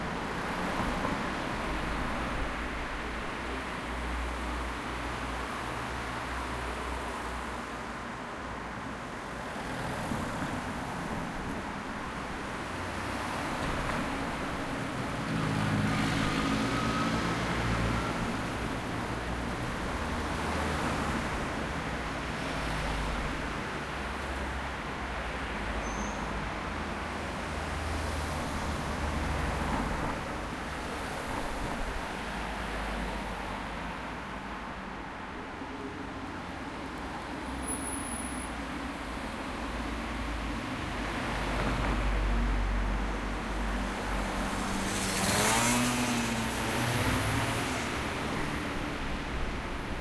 Traffic jam passing from left to right channel.
Polarity: Large (120')